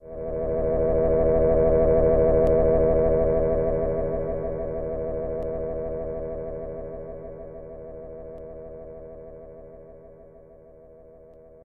79908 PstrStv HF Horroremix
A remix of Nicstages' Pastor steve recording.